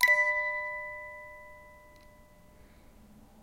one-shot music box tone, recorded by ZOOM H2, separated and normalized
bell, box, music, tones